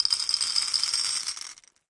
Bag of marbles poured into a small Pyrex bowl. Glassy, granular sound. Close miked with Rode NT-5s in X-Y configuration. Trimmed, DC removed, and normalized to -6 dB.
glass, pour